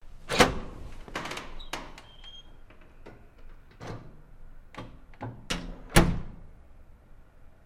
Metal push door open
This is a industrial sized metal door opening then closing.